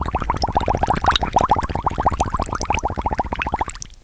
recorded with cheap dynamic onto HDD, low signal bad noisefloor etc, but might still be usefull for someone, I hope.
More busy sounding bubbles.